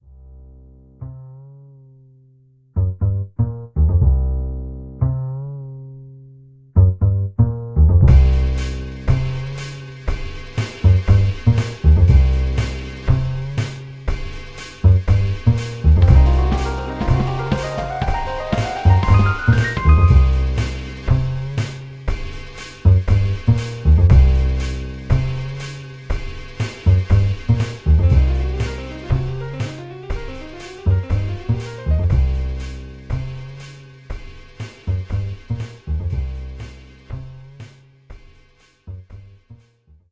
harriaccousticloop2120bpm Hello Mix
put that in here and made a nice loop. bass by harri, just search the username